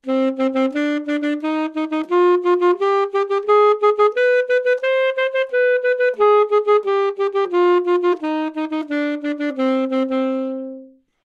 Part of the Good-sounds dataset of monophonic instrumental sounds.
instrument::sax_alto
note::C
good-sounds-id::6632
mode::natural minor